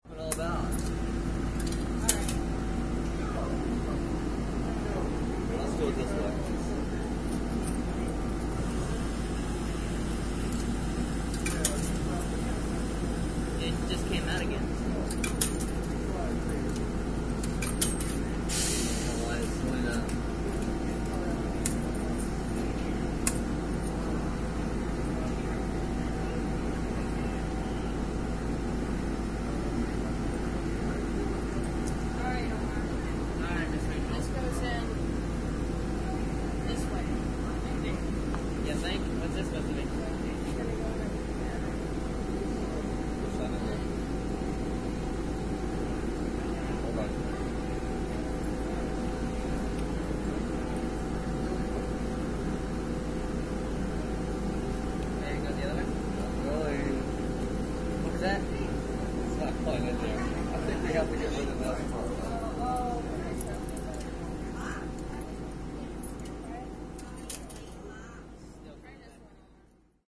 a ticket station4
This recording was taken at Mangonia park, where a group of travelers purchased train tickets.
money, trains, beeps, air-breaks, motor, speech